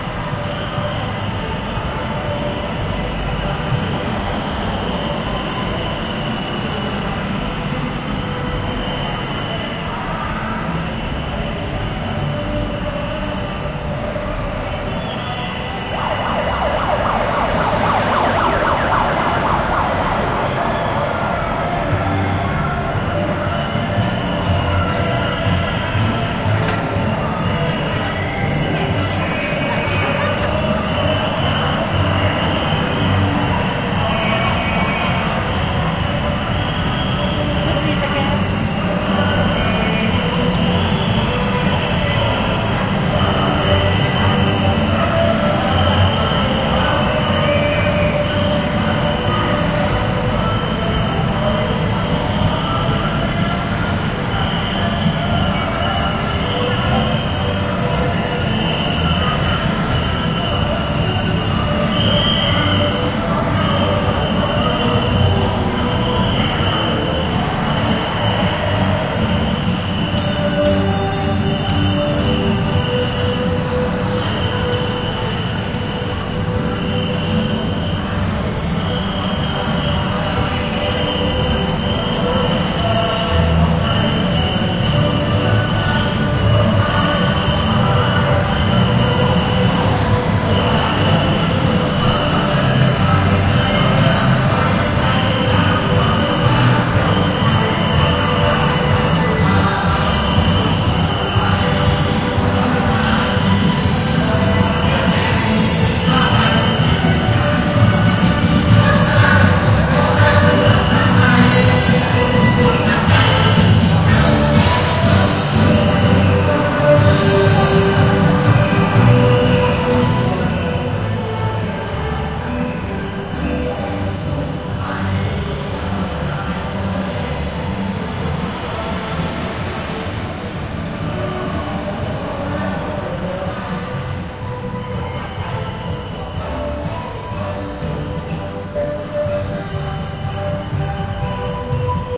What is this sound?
Bangkok 2014 Shutdown
Recording taken on the street in Bangkok during 2014 shutdown demonstrations.
2014, Bangkok, Demonstrations, Street, Thailand